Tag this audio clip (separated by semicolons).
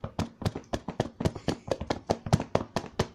Reaper; Audio1; Variety